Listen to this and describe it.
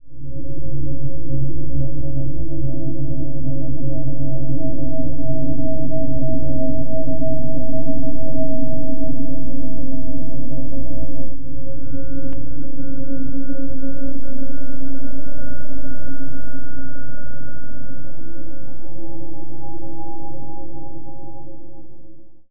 musicalwinds new
spacial resonant winds ambient sound effect on a barren icy planet
ambient, effect, resonant, sound, spacial, winds